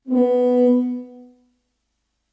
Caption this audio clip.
tuba note-1
ambiance, ambience, sound, terrifying